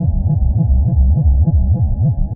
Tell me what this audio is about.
Deep drone pulse created with FL-Studio 6.almost the same as weirdmachine, but added Filter, phase shifter and little distortion.
drone, ambient, deep, pulse, bass, ambience